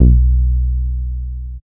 Sub Mania 04
SUB BASS SUBBASS